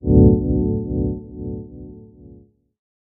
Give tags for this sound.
sound-design; resonance; button; beep; typing; weird; push; alarm; freaky; computer; digital; abstract; splash

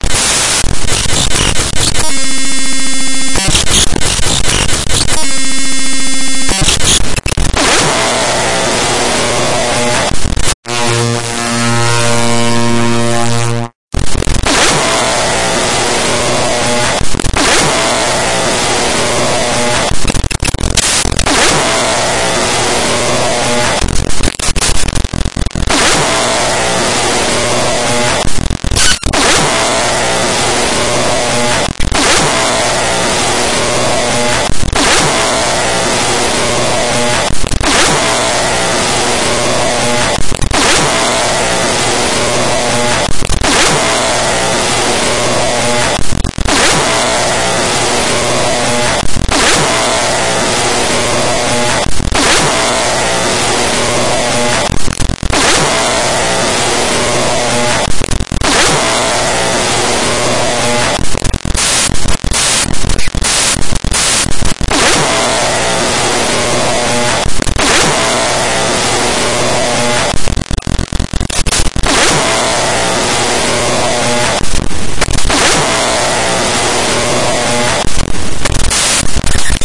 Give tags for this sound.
glitch; noise

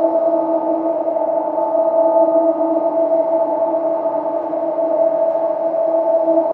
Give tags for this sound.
ambient; drone; electronic; generative; processed

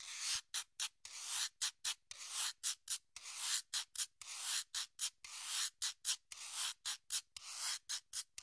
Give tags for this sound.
guiro; scraper; bpm; 114; metal

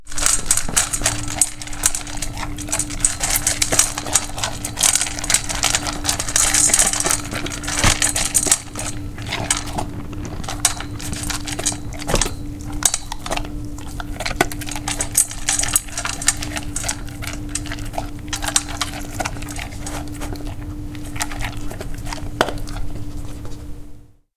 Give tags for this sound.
dog; dog-food; eats-dog-food

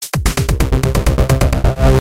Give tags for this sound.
suspense; shocked; shock

